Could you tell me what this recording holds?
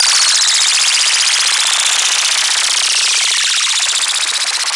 This is a lead synth sound I made for the XS24 on the Nord Modular G2 and Universal Audio UAD emulations of the Neve EQs, LN1176 Limiter, 88RS, Fairchild, and Pultec EQs. Also used the Joe Meek EQ from protools.
lead, modular, synth, goa